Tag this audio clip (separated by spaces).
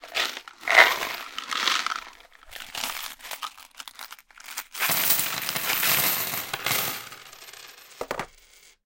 box coins table wooden